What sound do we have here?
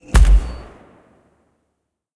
A Sci-Fi Energy Gun sound I made out of these weird vibrating back massagers and whatever else I could find.